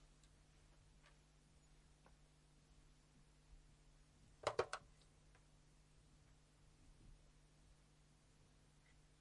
Telephone - Hang up L Close R Distant
Hanging up a lightweight modern home or office telephone. Recorded in studio. Unprocessed.
akg, answer, answering, channel, close, distant, dual, foley, fostex, hang, hanging, home, light, lightweight, modern, mono, office, perspective, phone, pov, rode, studio, telephone, unprocessed, up